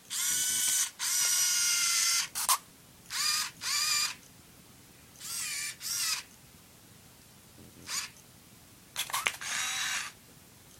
MinoltaV300Picture5
Click! I take a picture with a Minolta Vectis-300 APS film camera. Clicking of the shutter and then the film winds. There are several different sounds in this series, some clicks, some zoom noises.
camera-click, click, film-camera, focus, minolta, shutter, vectis